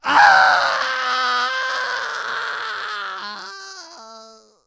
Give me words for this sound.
Zombie related screams
screaming, scared, zombies